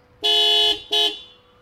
horn, hooter
horn, horns, noise, road